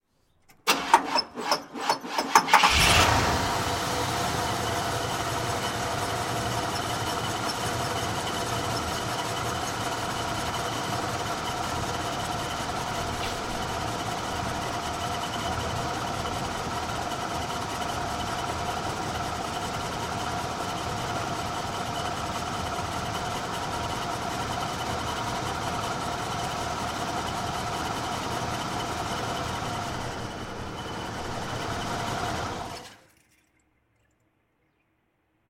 Vintage Cadillac Turn On Off Engine
cadillac, engine, vintage